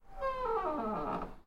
Door Squeak, Normal, C
Raw audio of a regular wooden door being opened and squeaking slightly.
An example of how you might credit is by putting this in the description/credits:
The sound was recorded using a "H1 Zoom recorder" on 5th April 2016.
squeak, creak, wooden, door, normal